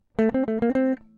guitar chromatic 1
Improvised samples from home session..